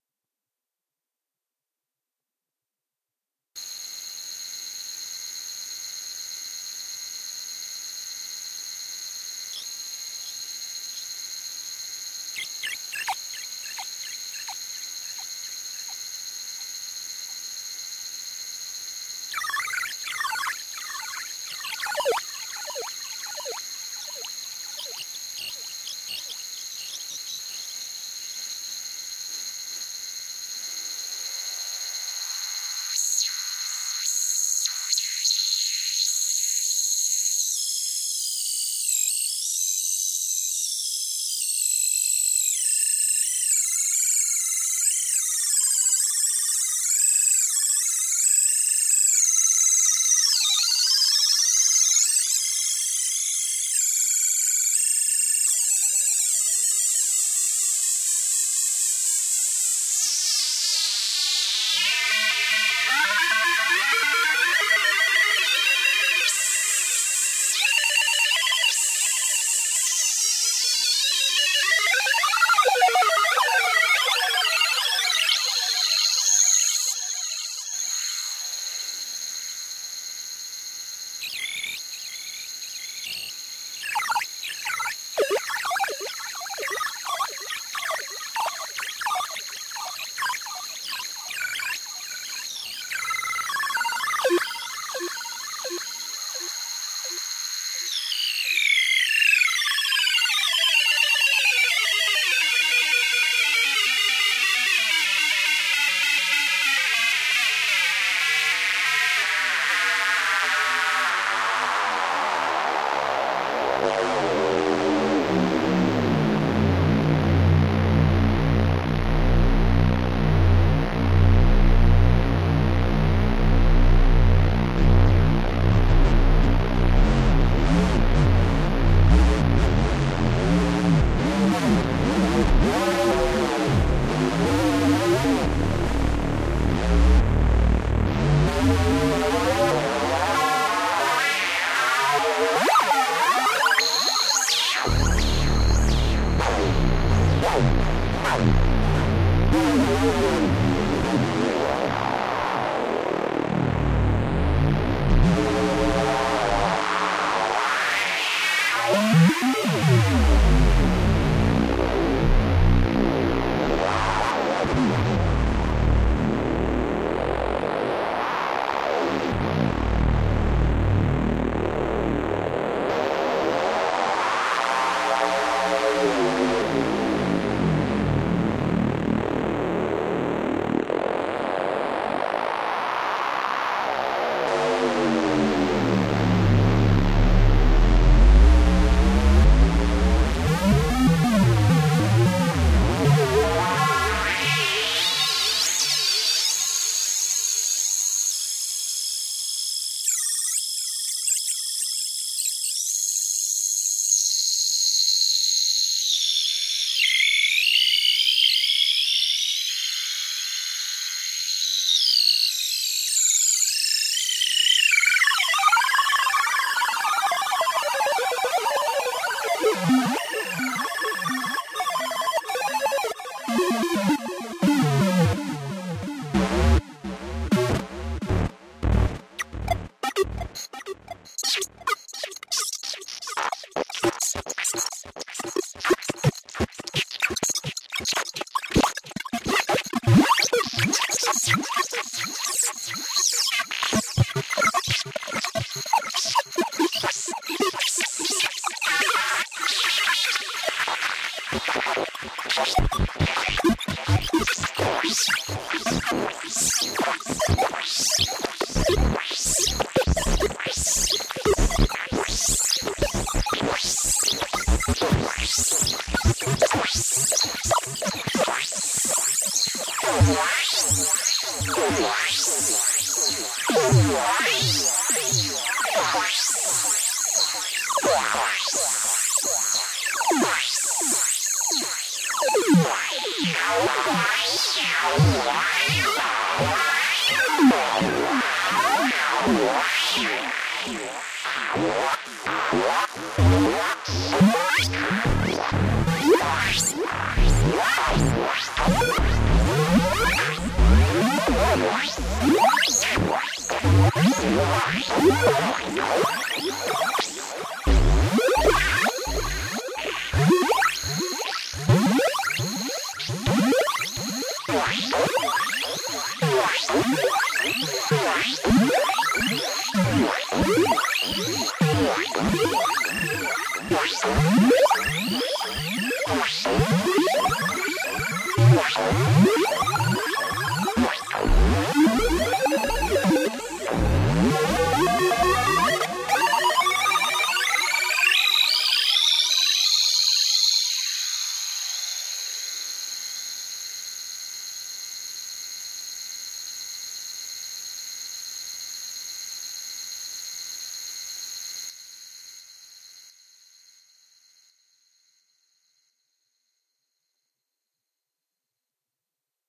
blake pad 2-97
This is part of a series of experimental synthesized tracks I created using a Korg Kaoss Pad. Performed and recorded in a single, real-time situation and presented here with no added post-production.
The KAOSS PAD lets you control the effect entirely from the touch-pad in realtime. Different effect parameters are assigned to the X-axis and Y-axis of the touch-pad and can be controlled simultaneously, meaning that you can vary the delay time and the feedback at the same time, or simultaneously change the cutoff and resonance of a filter. This means that complex effect operations that otherwise would require two hands on a conventional knob-based controller can be performed easily and intuitively with just one hand. It’s also easy to apply complex effects by rubbing or tapping the pad with your fingertip as though you were playing a musical instrument.
electronics, space